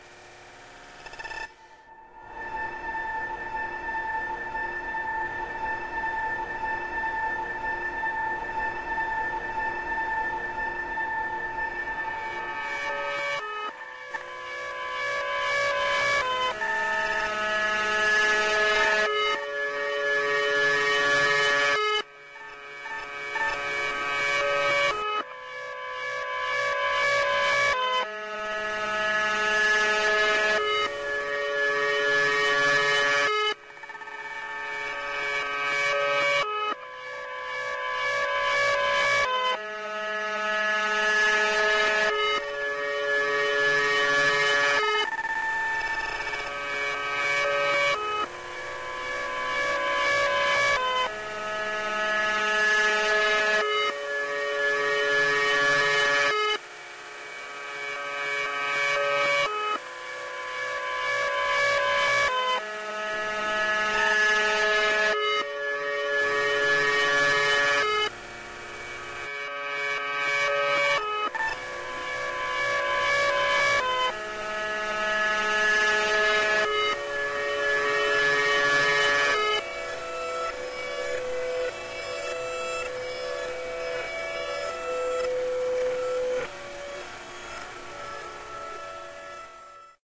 Short guitar riff inverted with some echo which gives a industrial impression...
psychedelic, guitar, industrial, echo